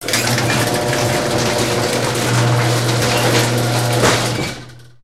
Garagedeur-Sluiten2
Sluiten van een Garagedeur. Closing a garage door
sluiten, deur